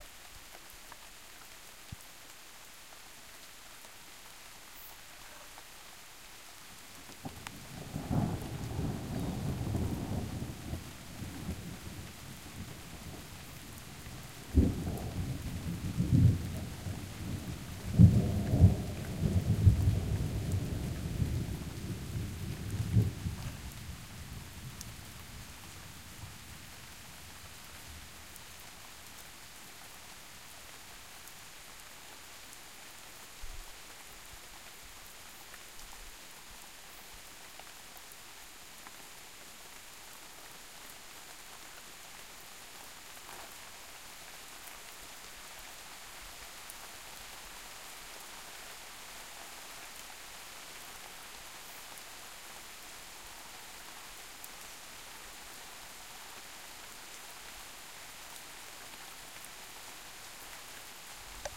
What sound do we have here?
Rain and thunder short
Rain starting and thunder.